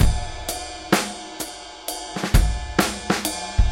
trip hop-09
trip hop acoustic drum loop